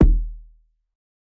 Kick coming from Yamaha PSR-215 going to EQ-10 -> Morley Pro Series II -> Boss MD-2 ending up at UR44.
BOSS, EQ-10, HIP-HOP, HOUSE, II, KICK, MD-2, MORLEY, MXR, PRO, PSR-215, SERIES, TECHNO, YAMAHA